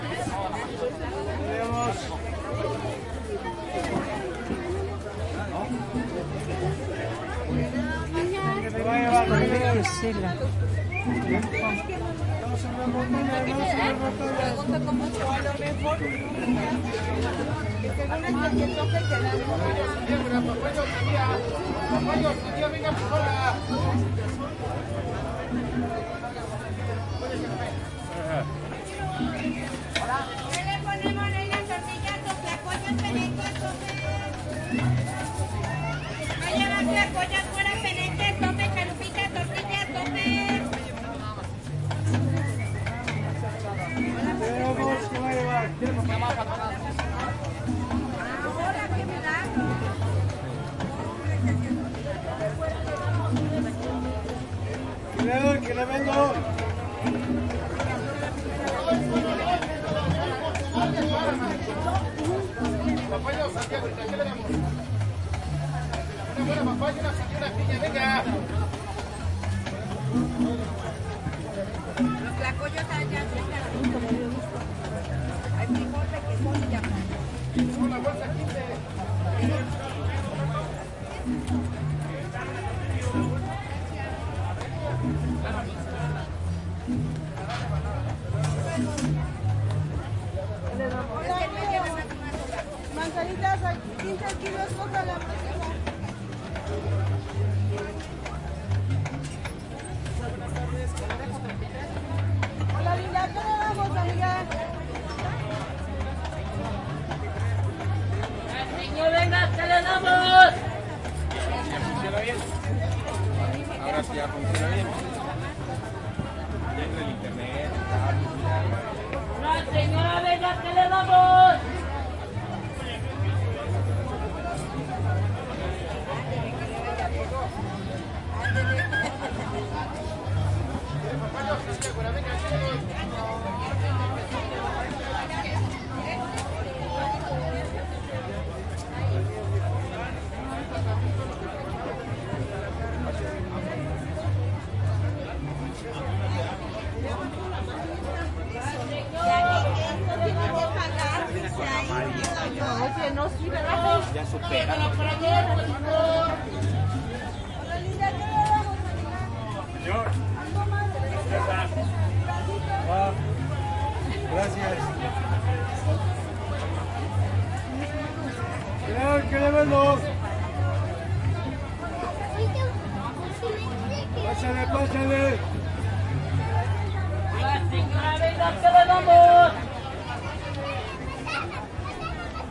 Tianguis (Market) Atmosphere in méxico city.
atmosphere, general-noise, market, salesman, ambient